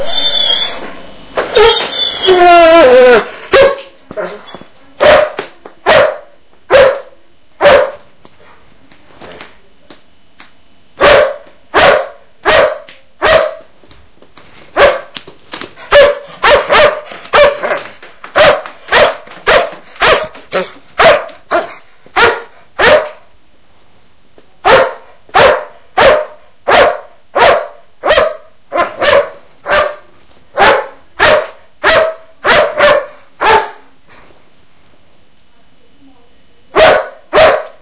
My dog waiting for a walk while i was recording him.